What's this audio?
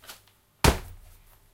The Sound of me jumping, with a heavy thud of a landing
jumping, jump, thud